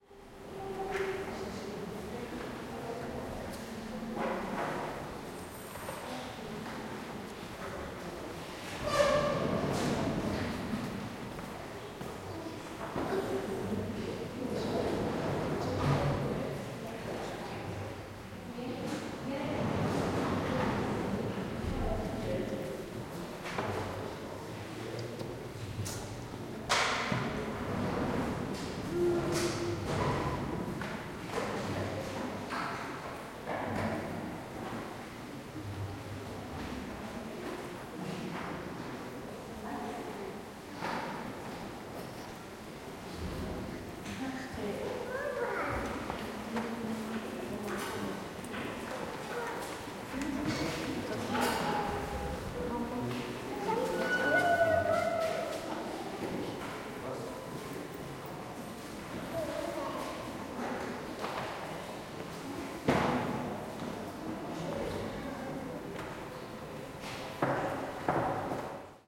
Churchgoers and tourists in the church of Madonna del Sasso.
They are leaving after mass or taking pictures.
Recorded in Ticino (Tessin), Switzerland.